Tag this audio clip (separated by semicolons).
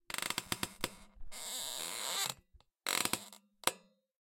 creaking; squeak